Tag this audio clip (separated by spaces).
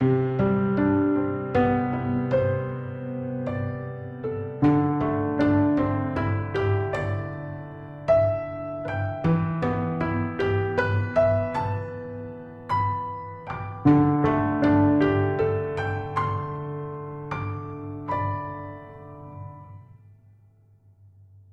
piano acoustic